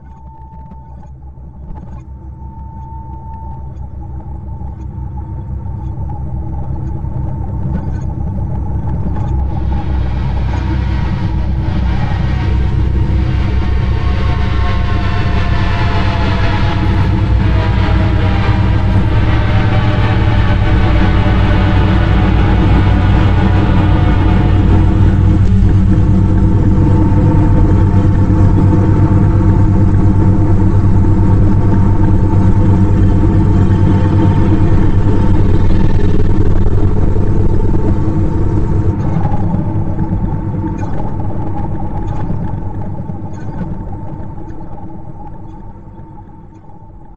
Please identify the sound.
This is not a record. It's faked. I had some four old sound clips, none of them very good. But after mixing them together, the result isn't too bad. You get a sence of realism by all noises that follow an elderly freight train. No star, I know, but I'm sure someone will download because of the slamming, banging, rambling ...
freight, diesel, heavy, train, railway